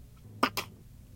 hit cartoon
transition between two clips